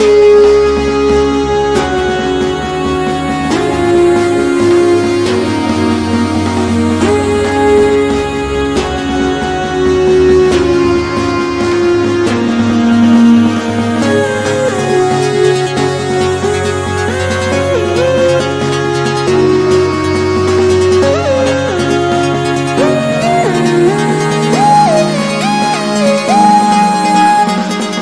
superhappycheesyloop1of2
It's the cheesy victory riff! Two cool little music loops for your super happy moment :) 137bpm. key of Csharp
loop, happy, cool, retro, music, game, video, cheesy, space, alien